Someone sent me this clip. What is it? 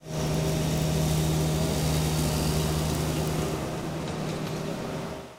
Lawn Mower Edit
mower, m, lawn, lawnmower, cutter